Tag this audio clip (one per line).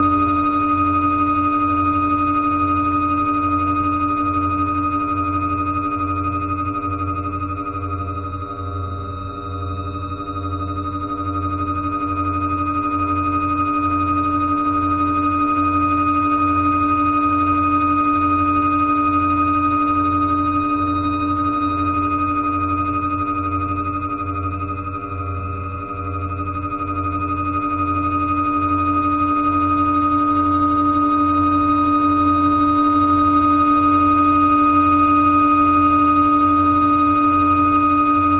ambience
ambient
backgroung
experiment
film
illbient
pad
score
soundscape
soundtrack
strange
texture
weird